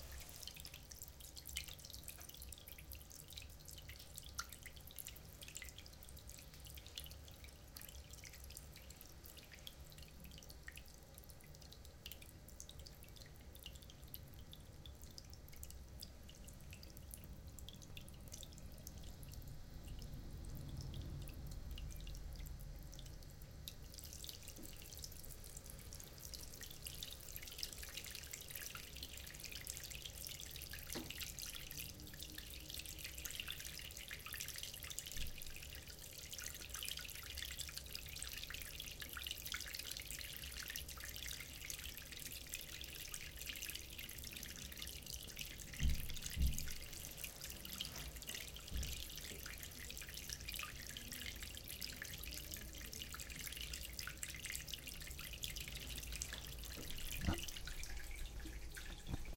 Water from a tap striking a shallow stone sink